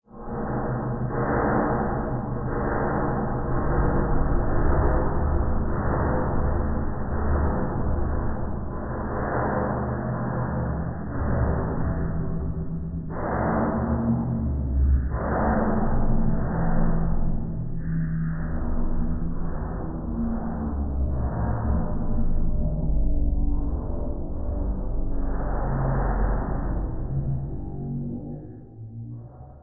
This sound effect illustrates somebody wrecking something in the distance
background, alien, ambient, effect, world, tunnel, tension, hammer